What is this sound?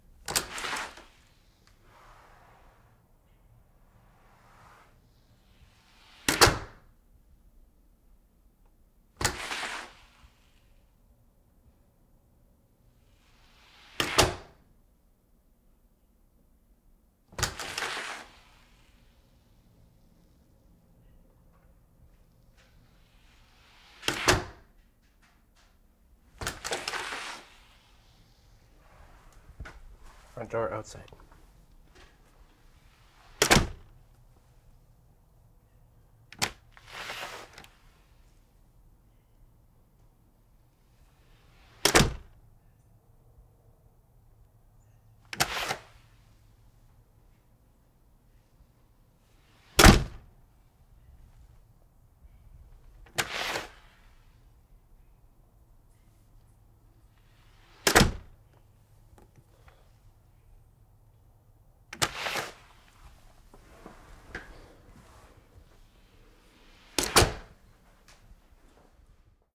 Modern Front Door Inside and Outside DonFX
Modern Front Door open and closed, from inside ad outside perspectives.